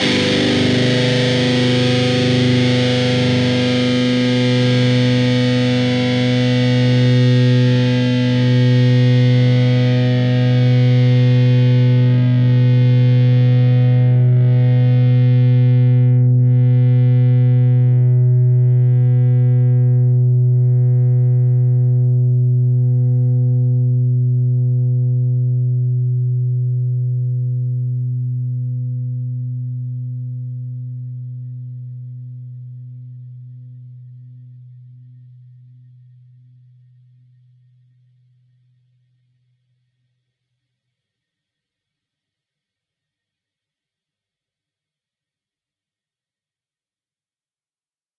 Dist Chr G rock
E (6th) string 3rd fret, A (5th) string 2nd fret. Down strum.
chords, distorted-guitar, guitar, guitar-chords, distortion, rhythm-guitar, distorted, rhythm